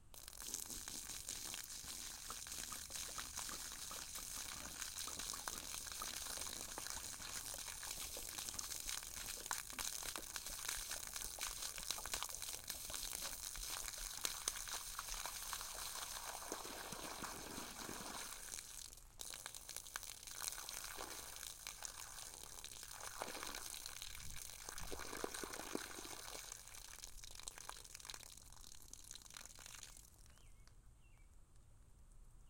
Liquid Gurgle Pour Splash dirt FF212
Clinking, short pour of liquid into empty glass
empty, liquid, Clinking, pour, glass